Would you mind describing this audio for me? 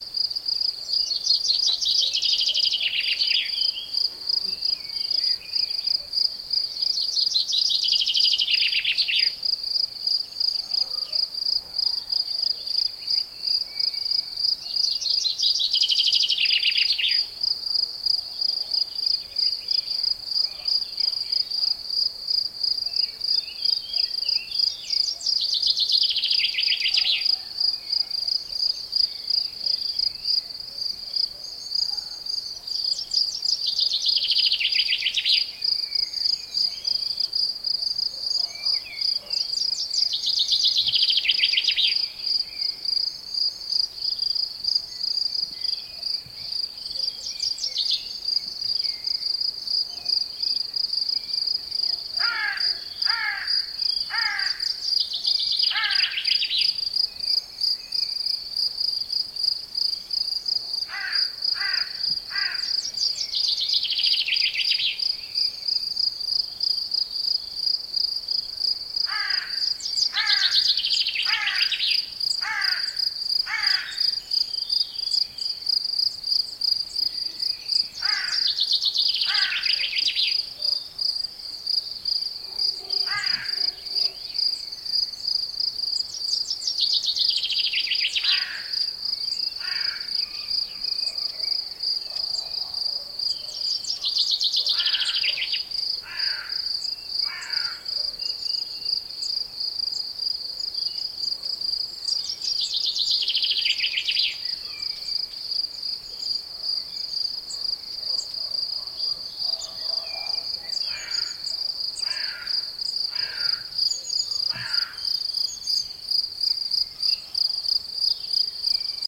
Pinzon Vulgar :: Common chaffinch
En primer plano canta un pinzón vulgar, hay un fondo de grillos, se oye el graznido de una corneja.
Grabado el 01/06/14
In the foreground singing a common chaffinch, there is a background of crickets, the caw of a carrion crow is heard.
Recorded on 01/06/14
birds, chaffinch, crickets, crow, grillos, La-Adrada, naturaleza, nature, pajaros, pinzon-vulgar, Spain